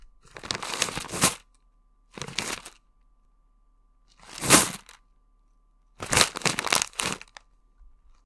Just shaking a skittles bag!